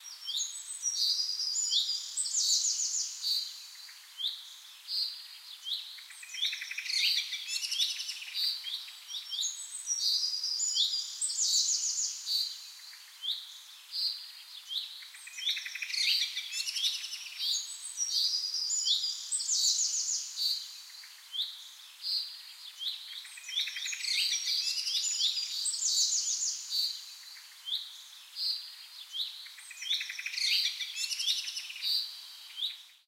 Birds Singing, Forest (Scotland)
Birds singing in a forest in the hermitage of braid local nature reserve Scotland.
Field-recording, ambient, singing, ambience, ambiance, birds